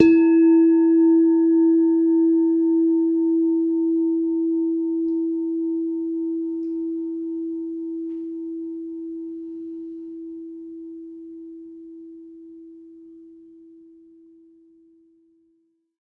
mono bell -10 E 16sec
Semi tuned bell tones. All tones are derived from one bell.